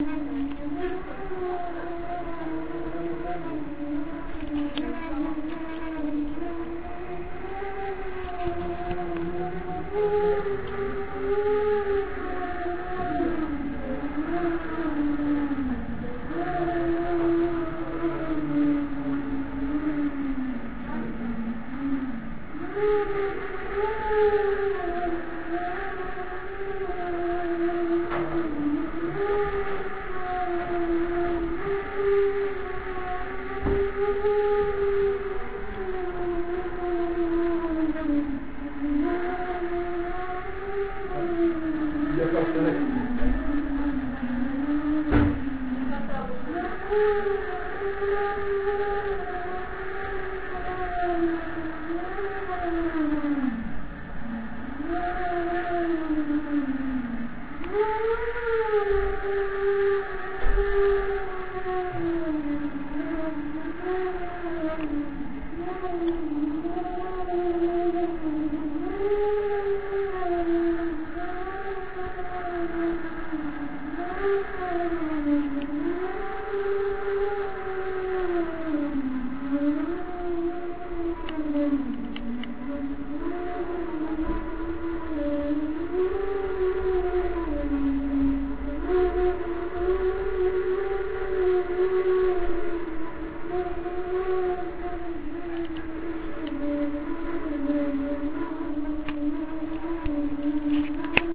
this sound is belong to whistle of tea machine at my office.